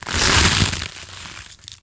Munch 10 (long)
Some gruesome squelches, heavy impacts and random bits of foley that have been lying around.
foley, gore, vegtables